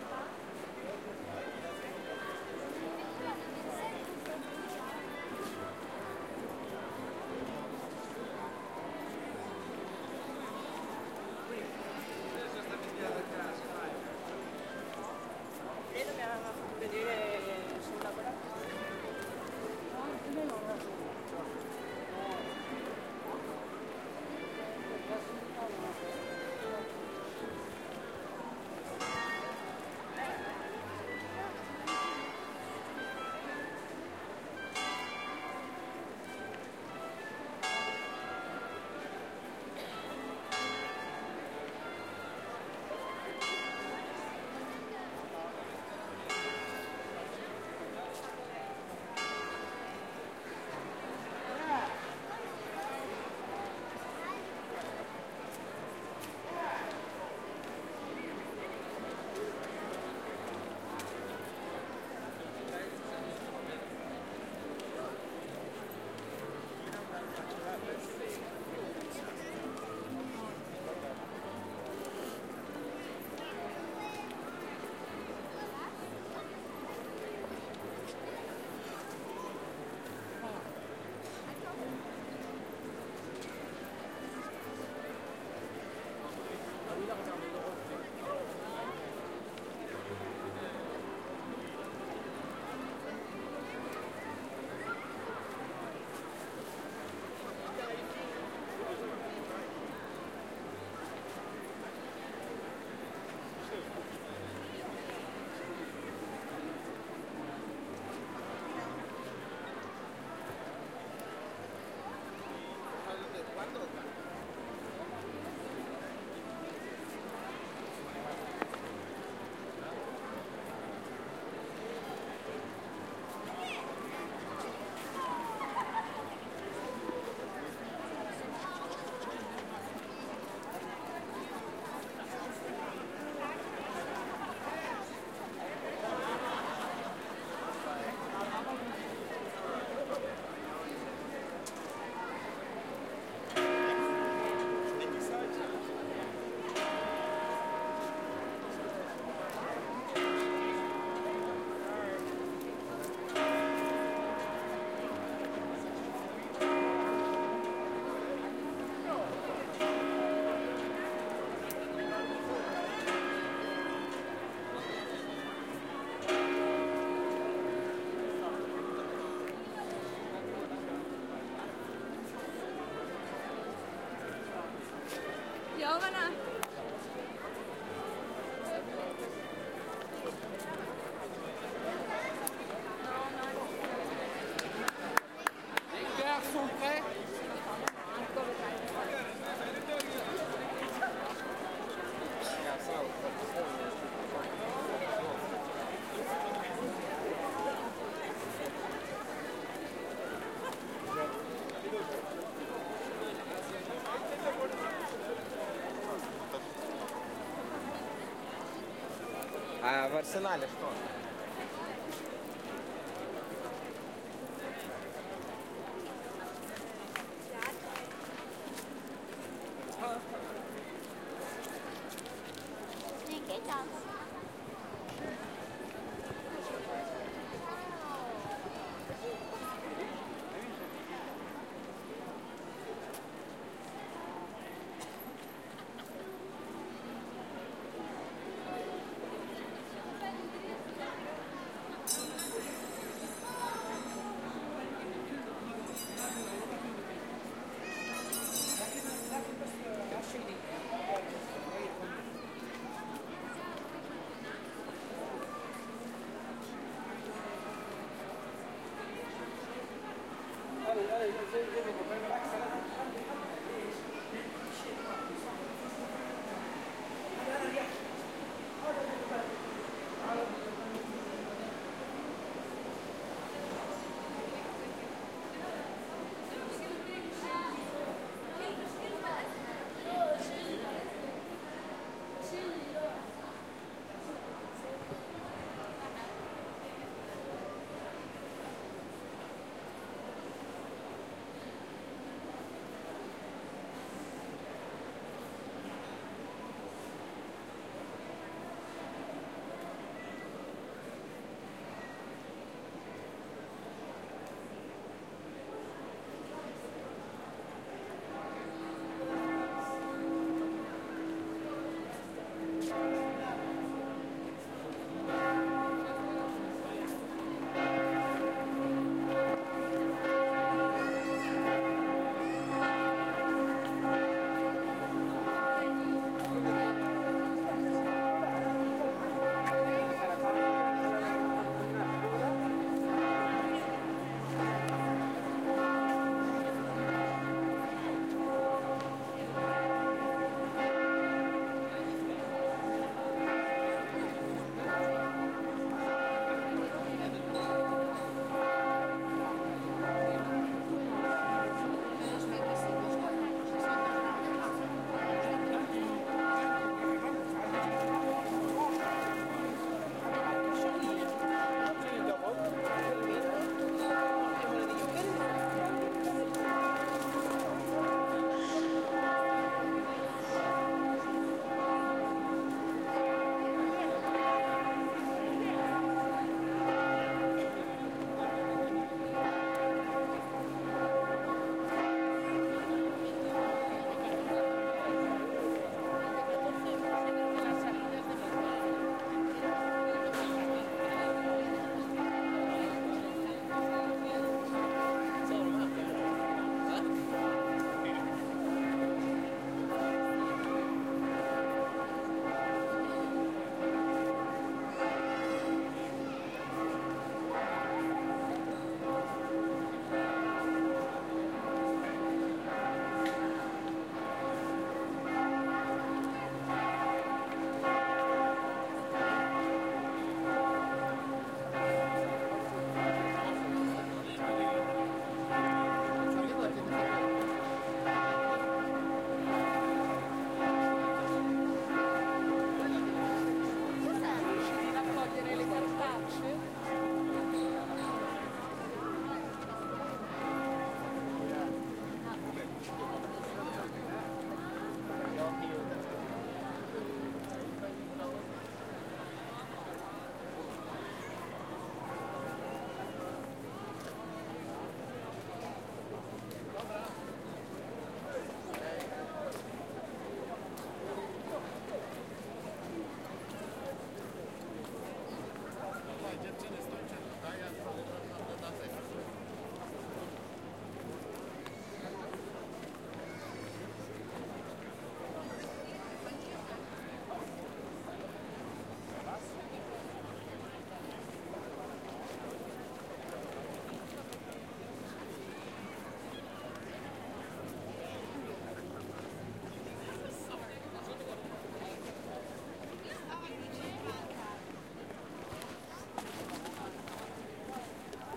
130822- piazza s. marco arrival
... just arriving from Lido ...
recording, venezia, italia, field, piazza